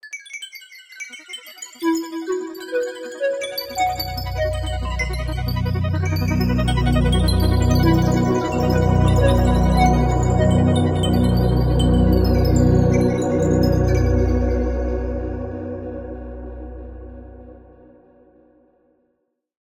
The sound of twinkling stars accompanied by a brief synth piece.